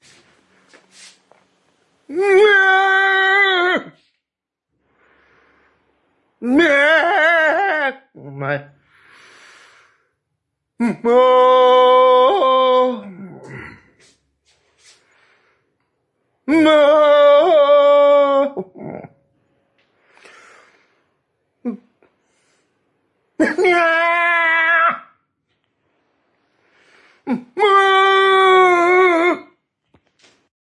Old man scream
oldman, old